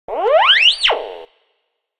Detector Weeou
A metal detector sound
metal, weou, detector